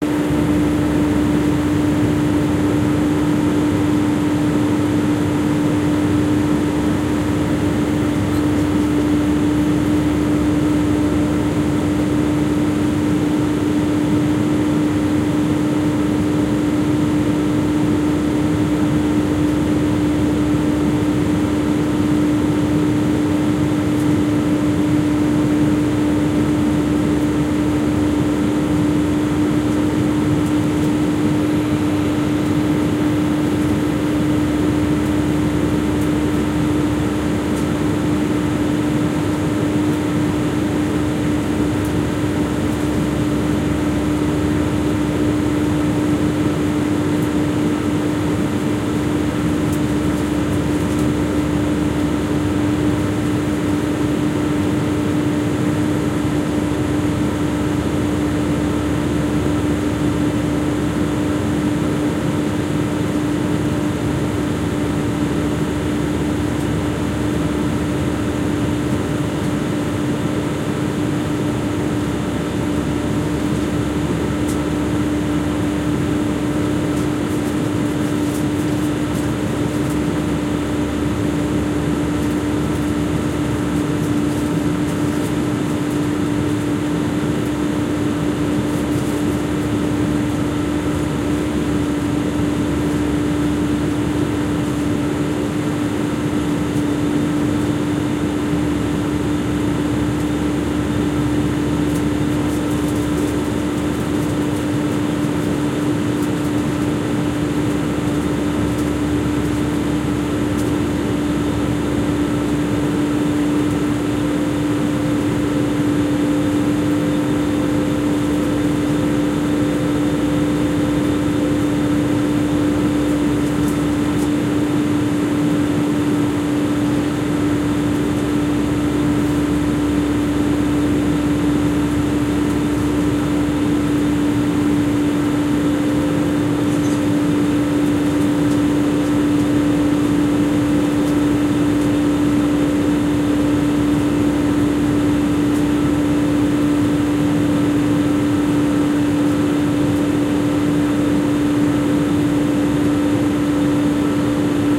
Airplane cabin ambience / atmosphere Airbus A330-300